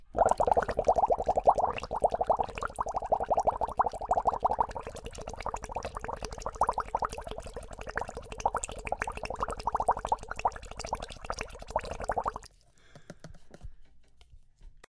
water bubbles
Blowing through a straw to create bubbles in a plastic container filled with water